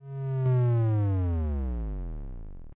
Turning down power
Just a sound I created that sounds like shutting down a facility.
created-artificially, cutting-off-power, shutting-down